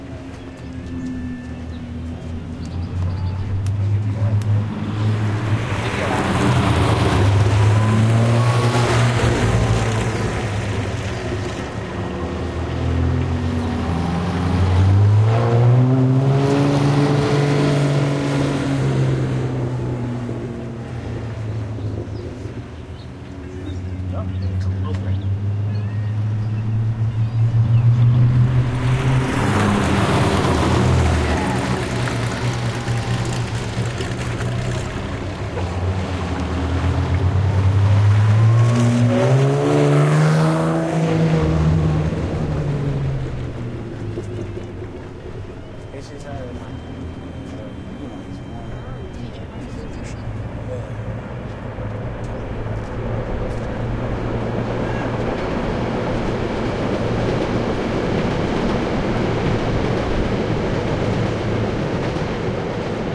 Vintage Race Car Passing 1
Stereo recording of a vintage race car passing by at close distance. There is a crowd around.
racing, field-recording, car, motor, pas-by, automobile, drive, engine, auto, driving, race